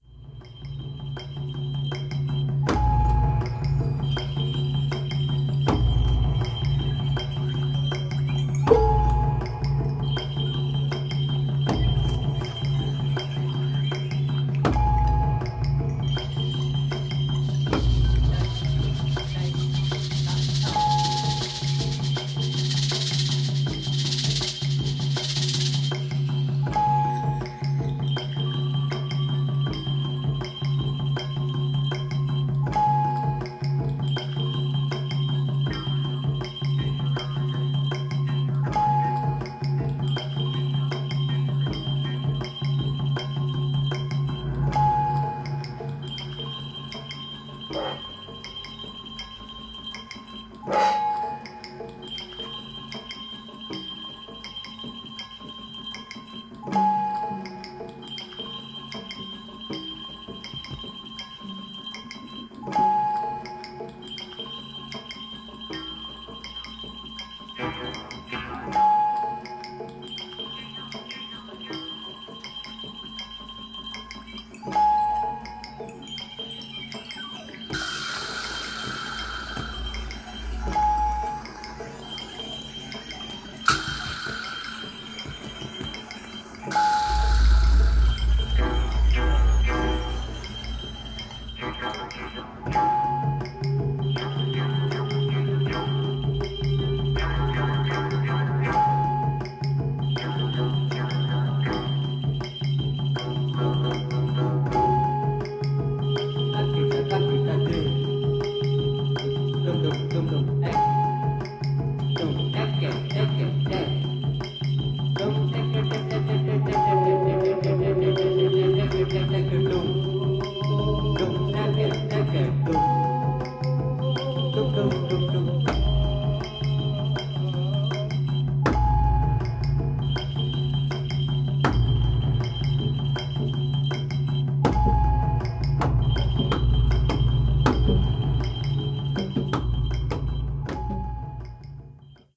Mridangam and morsing in an ambient soundscape
This is a recording made in a rehearsal session for an electroacoustic orchestra. Morsing (A jaw-harp like instrument used in Carnatic music) and Mridangam are heard against the backdrop of an ambient sound scape.
percussion, drum, geo-ip, morsing, electro-acoustic, ambient, mridangam, indian